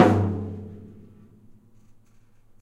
Rack tom from my friends neglected kit.
drums, live, percussion